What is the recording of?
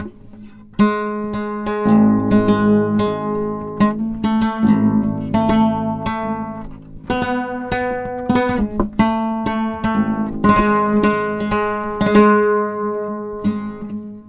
I have always admired gutarists like Segovia, Eric Clapton, Mike Oldsfield, Woodie Guthrie, BB King to mention some. Myself I play nothing, didn't have the luck to grow up in a musician family. So I have whole mylife seen myself an idiot who can never learn an instrument. Had a visit recently, a friend of my daughter. He found an old, stringless guitar among a lot of stored stuff in our cellar. He had just bought a set of strings for his guitar, but he mounted them on our guitar. He was playing for an hour and I said how I envy him. Why, he answered, here, sit down and play. I put THe instrument aside and told him I was too stupid. Well, maybe, he replied, but most of us guitarists are idiots. To my surprise I found the strings, but had certain problem to press hard enough to get a clear tone. I tried for a couple of hours and recorded the fumbling and rattling. Next day I tried again and recoded and I spent an hour for five days. You can follow file novasound330a to 330e.